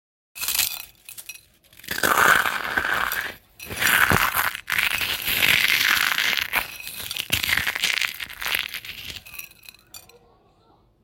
Frosty Crack
Crunching/ Grinding cereal in the palm of my hand onto a plate. Raw audio, no edits. May be background noise.
cereal, cornflake, crack, crush, field-recording, foley, grind, no-edit, raw-audio